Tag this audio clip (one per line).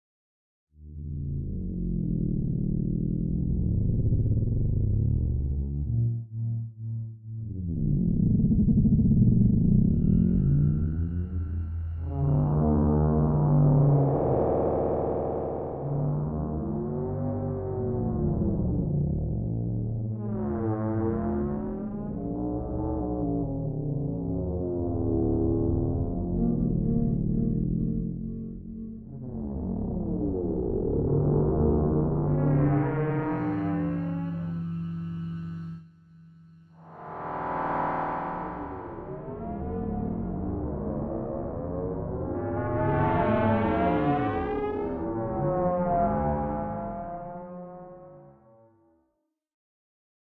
ambient
nausea
drone
sfx
sci-fi
sound-effect
sweeps
wet
disorienting